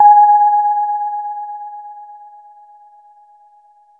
This sample is part of the "K5005 multisample 05 EP
1" sample pack. It is a multisample to import into your favorite
sampler. It is an electric piano like sound with a short decay time an
a little vibrato. In the sample pack there are 16 samples evenly spread
across 5 octaves (C1 till C6). The note in the sample name (C, E or G#)
does indicate the pitch of the sound. The sound was created with the
K5005 ensemble from the user library of Reaktor. After that normalizing and fades were applied within Cubase SX.
electric-piano, reaktor